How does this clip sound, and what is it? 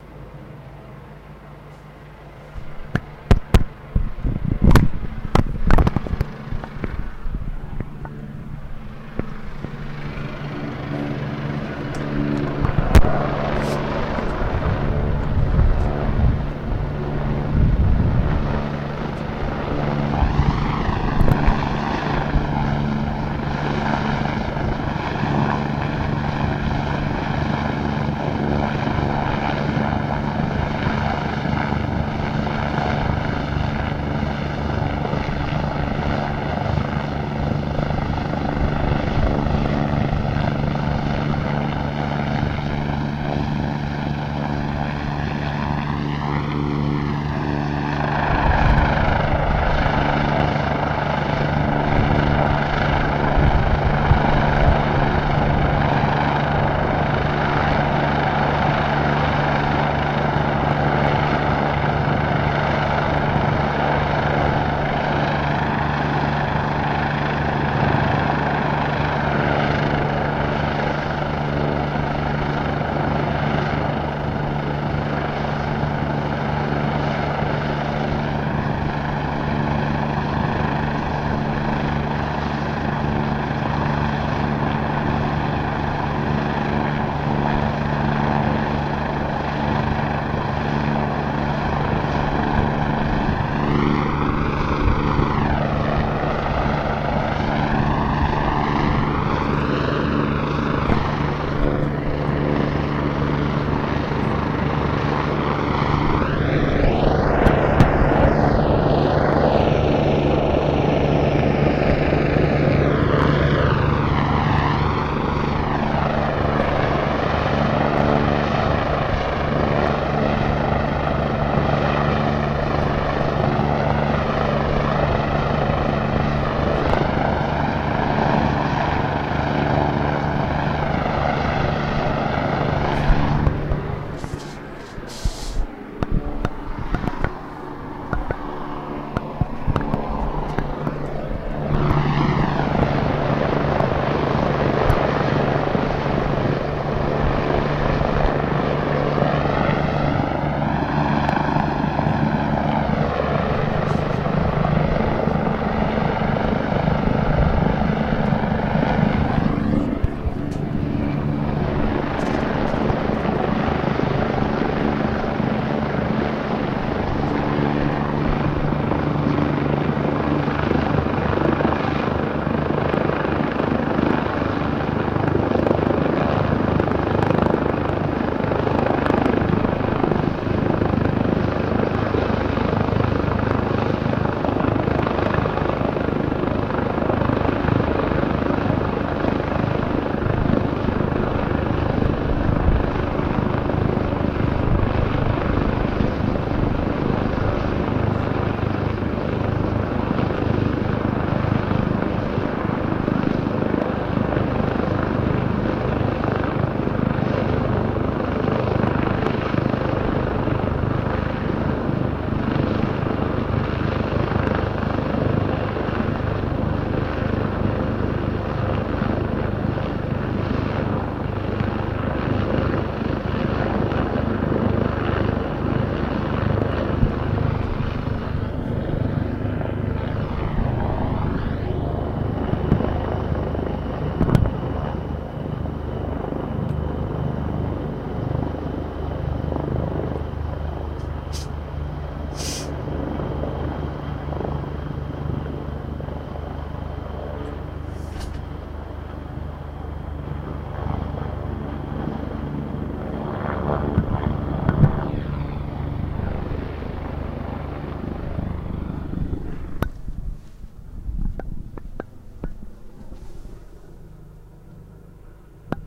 helicopter se23 3df 30-12-07
police helicopter hovering over forest hill recorded from the loft dead above my house, it hovered off else where slowly, strange as no sirens or cars whizzing or \search light.
helicopter, london, forest, hill, flight